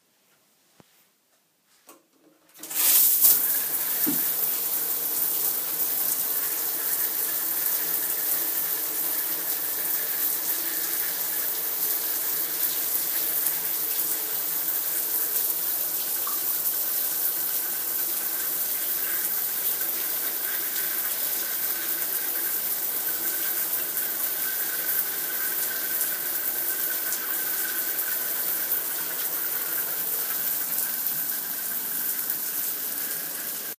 Shower, water recording.